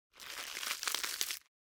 Crispy Spring Onion 2
Crispy spring onion sound, suitable for sound effects. Recorded with a Sennheiser MKH60 microphone.
gore, gross